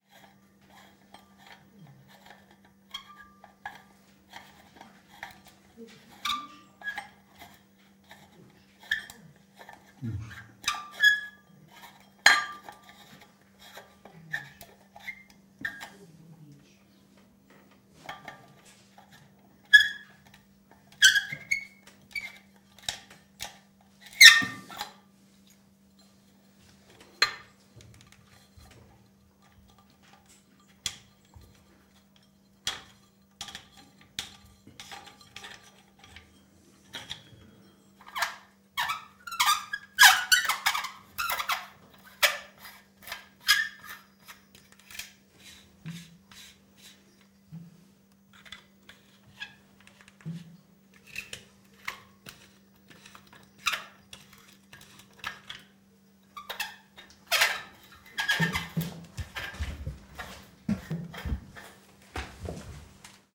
domestic foley changing light bulbs at home
a person is replacing a dead light bulbs in a chandelier
squeaks and screw-in sounds
bulb, chandelier, domestic, fix, light-bulb, repair, replacing, screw, squeak